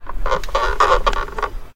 I recorded this sound on the stage (field-recording) with Zoom H4n and Sennheiser shotgun mic.